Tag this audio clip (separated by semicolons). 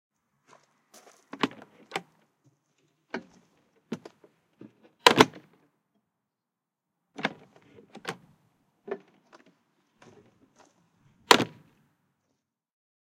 open; door; footsteps; close